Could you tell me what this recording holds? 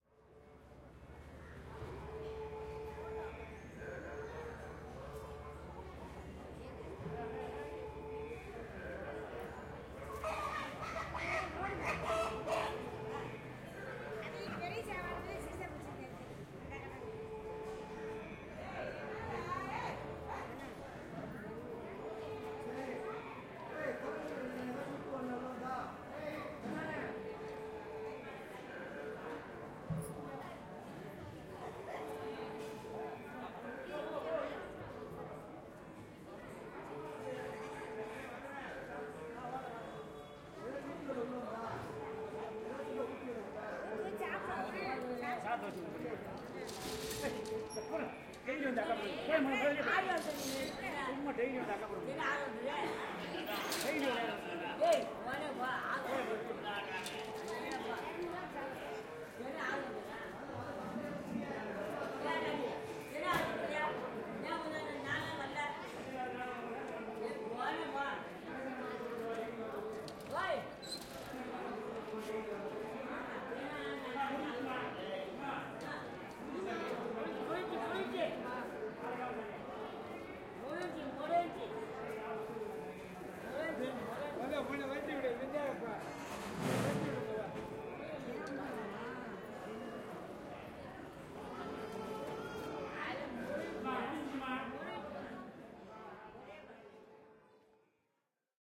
hindu temple garden, mantra loop
small crowd walk on the temple garden. ca.50 people listening mantra loop during the lunch inside.
ZoomH2N
Name me if You use it:
Tamás Bohács